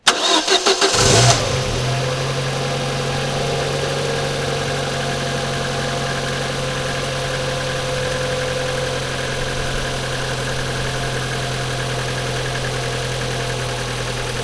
Car start 1
I recently contributed a track to a Triple LP set of krautrock cover versions ("Head Music 2", released by Fruits De Mer Records, December 2020). The song I chose to cover was Kraftwerk's "Autobahn".
If you know the track, you'll know that it uses synthesised traffic sounds alongside recordings of the same. On my version, I achieved these in three ways:
2) I got in my car with my Zoom recorder and made my own recordings of the engine starting, stopping, etc
3) I made my own sound effects using virtual synths and effects in Ableton Live 10
This particular sound falls into the second category.
car-engine, cars, starting, car-engine-ignition, car-engine-start, turn-over, street, start, traffic, engine-start, road, car, ignition, turning-over, engine, driving, engine-ignition